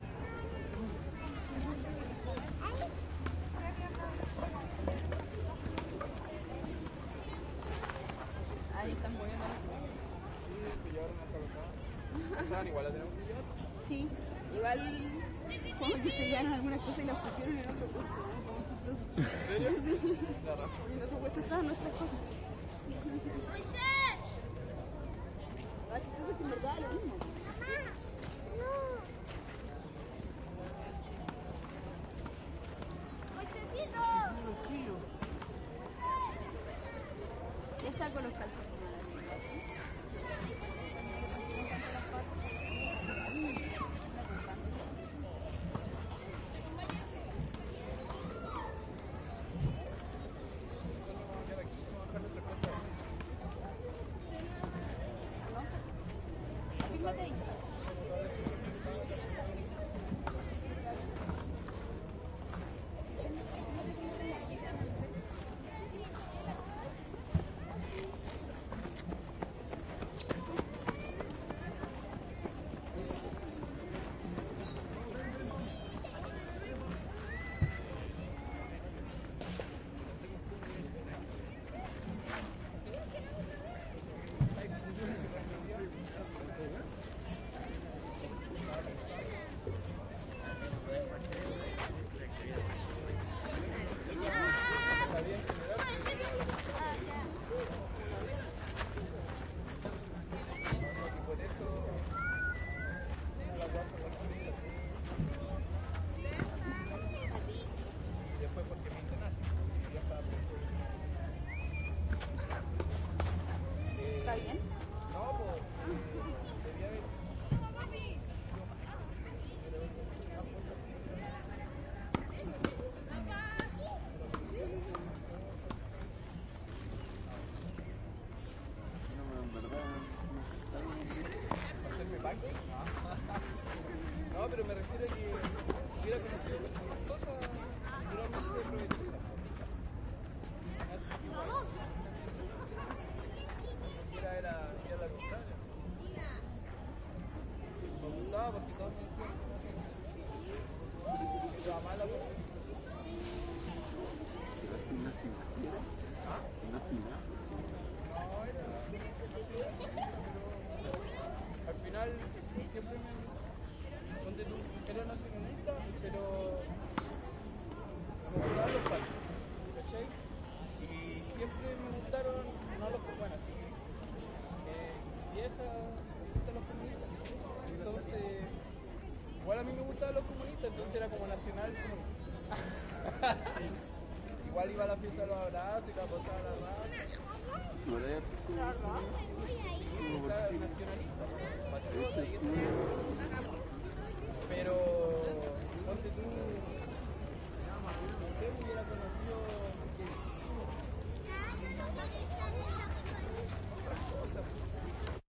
gratiferia 03 - quinta normal
Gratiferia en la Quinta Normal, Santiago de Chile. Feria libre, sin dinero ni trueque de por medio. 23 de julio 2011.
chile, gratiferia, market, normal, quinta, santiago, trade